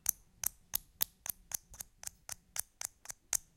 sound of moving loadstones